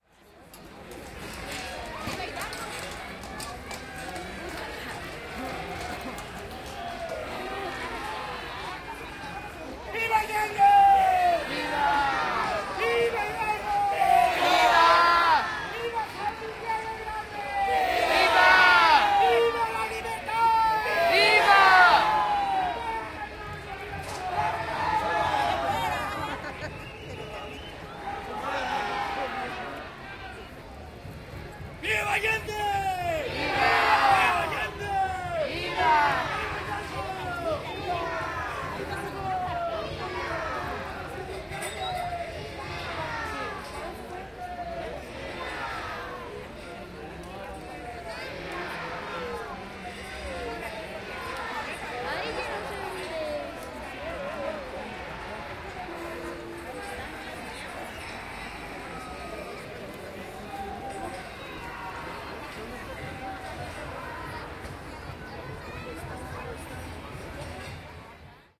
San Miguel de Allende, Gto, Mexico. September 16th, 2017.
Binaural recording Zoom H4. Microphones: SoundProfessionals
Ambience, Binaural, field-recording, Recording